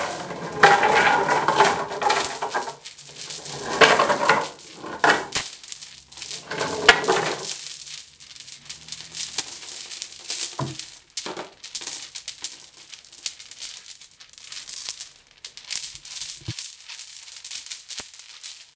RATTLING CHAIN 2
For the 2021 version of Christmas Carol I recorded myself dragging and dropping and rattling a number of heavy chains. During the Marley Scrooge scene I would clip out segments from these recordings for the chain sounds.
carol, xmas